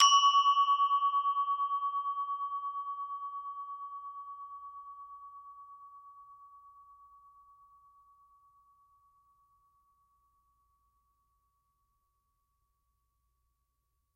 University of North Texas Gamelan Bwana Kumala Pemadé recording 18. Recorded in 2006.